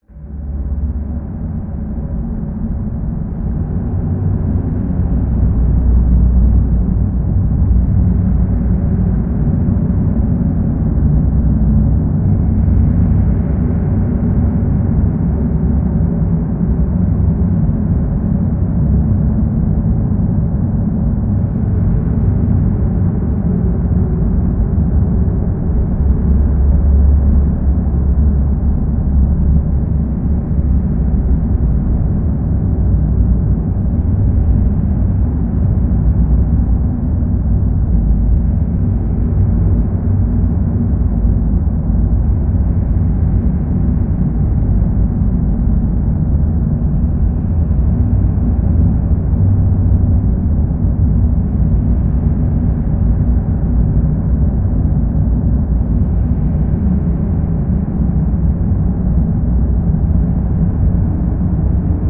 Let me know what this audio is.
Secret Temple Storm
Ambient, Atmosphere, Chill, Cinematic, Dark, Field-recording, Film, Movie, Relax, Scary, Secret, SFX, Sound, Soundscape, Storm, Temple, Travel, Wind